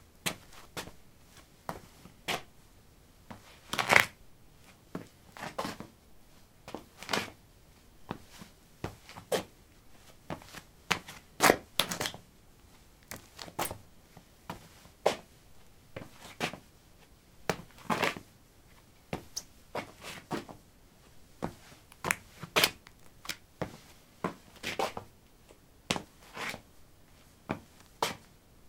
concrete 11b sneakers shuffle
Shuffling on concrete: sneakers. Recorded with a ZOOM H2 in a basement of a house, normalized with Audacity.
footstep, footsteps, step, steps